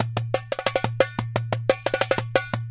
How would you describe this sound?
176 bpm 4/4 Drum and Bass style darbuka/doumbek loop recorded in stereo at my home studio with a Behringer B1 and a Shure SM57. Have fun with it.